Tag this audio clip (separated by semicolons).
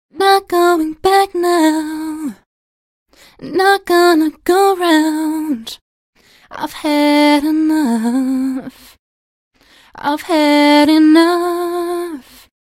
female; katy; vocodex-modulator; singing; vocal; a-capella